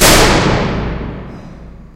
A door slam in a parking garage stairwell. Percussion sample, a snare perhaps?
city, noise, field-recording